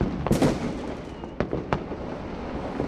delphis FIREWORKS LOOP 18 MO
Fireworks recording at Delphi's home. Inside the house by open window under the balcony Recording with AKG C3000B into Steinberg Cubase 4.1 (mono) using the vst3 plugins Gate, Compressor and Limiter. Loop made with Steinberg WaveLab 6.1 no special plugins where used.